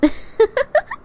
No talking, just a giggle.
cute, female, giggle, girl, happy, laugh, vocal, voice, woman